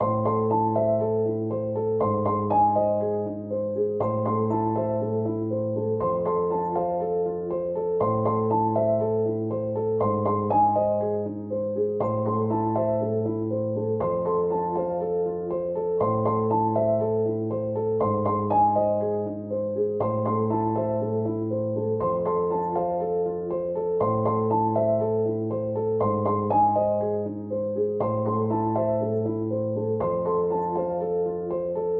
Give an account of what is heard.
Dark loops 204 piano with melody short loop 60 bpm
This sound can be combined with other sounds in the pack. Otherwise, it is well usable up to 60 bpm.
60, 60bpm, bass, bpm, dark, loop, loops, piano